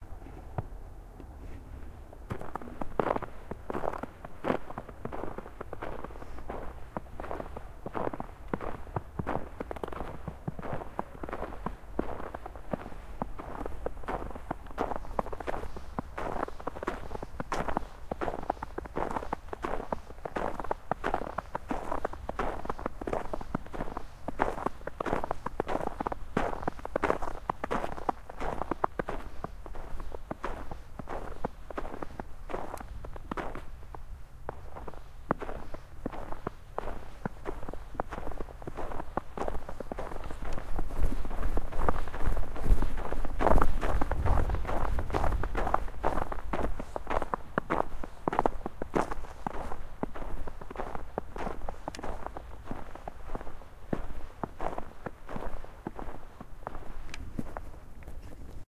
footsteps in the snow
I recorded this audio file with tascam dr-40 walking in the snow